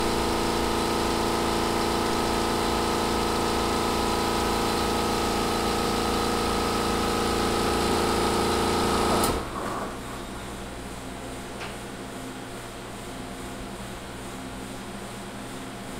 MOVIE THEATER BEHIND CONCESSION 02
A roomtone of behind the concession stand near the CO2 tanks for the soda machines. Recorded with a Tascam DR-40